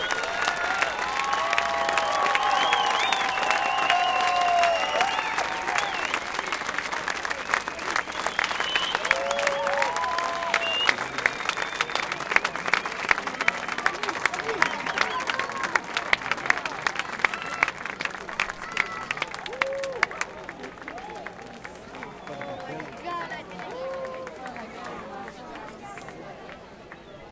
applause cheer medium crowd ext close outdoor festival performance
applause, cheer, close, crowd, ext, festival, medium, outdoor